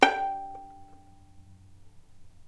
violin pizz vib G4
violin pizzicato vibrato
pizzicato, vibrato